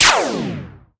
Laser shot element to be mixed with other laser samples. Can also be used individualy.